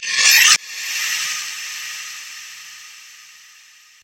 SFX tension cuchillo
Sonido producido a partir de la fricción entre un cuchillo y un afilador, la acción se generó en varias ocasiones. El sonido se editó agregándole una ecualización focalizada a las frecuencias medio altas para acentuar el sonido ascendente, y se le añadió por medio de un plugin nativo de protools una tenue reverberación para que diera el envolvimiento necesario e impactar al oyente.
cinematic, cuchillo, tension, foley, dramatic, cinema, film, movie, SFX, ascending